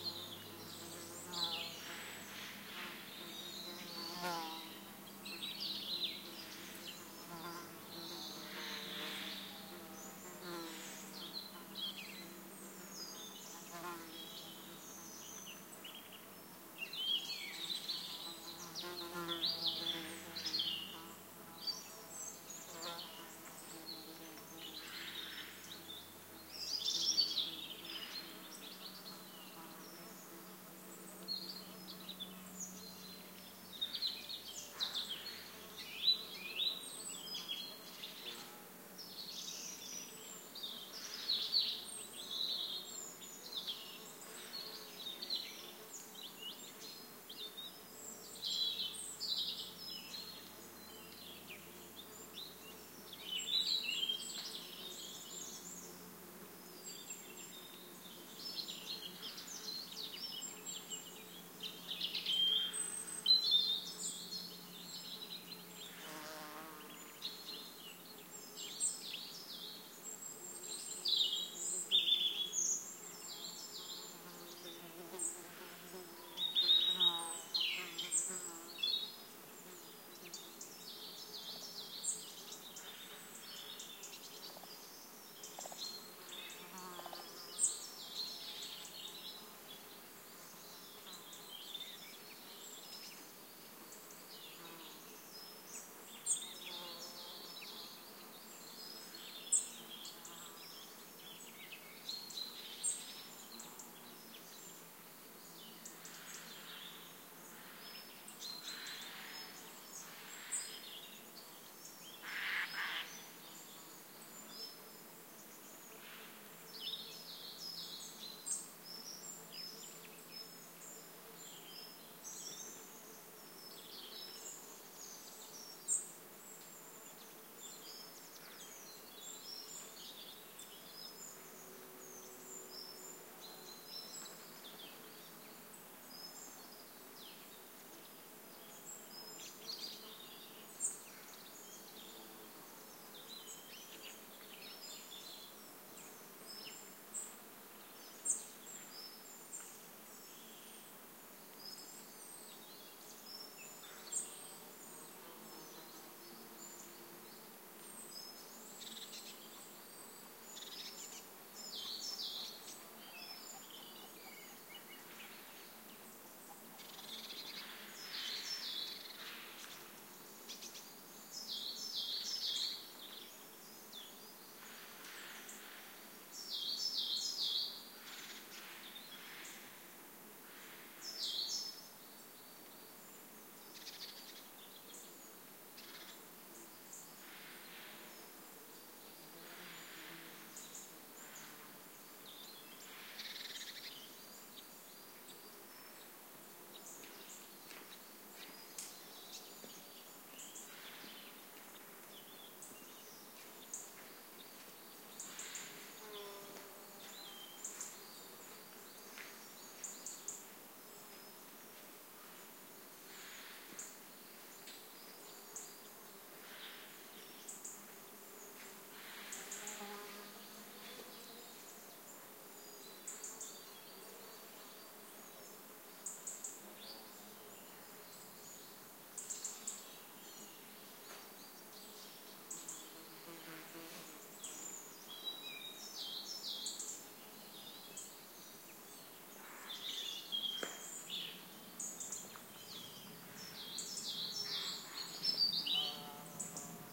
Natural ambiance with singing birds, buzzing insects. Recorded at 1000 m above the sea on Sierra de las Nieves Natural Park, near Ronda (S Spain) during an unusually warm autumn. Sennheiser MKH60 + MKH30 into Shure FP24 preamplifier, PCM M10 recorder. Decoded to Mid-side stereo with free Voxengo VST plugin